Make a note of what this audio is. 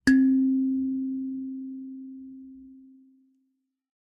Kalimba (C-note)
Recording of my Kalimba tuned to C-major (give or take a few cents), striking the lowest possible note. Recorded on a Rode NT1-A through a Scarlett 2i2 interface. Noise-reduced for clarity.
C, C-note, hit, kalimba, metal, metallic, musical, note, percussion, pluck, Rode, Rode-NT1, tine, ting